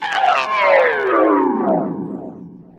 Processed sound from phone sample pack edited with Cool Edit 96. Stretch effect applied then gliding pitchshift, echo and flanger.
male, stretch, echo, flanger, mangled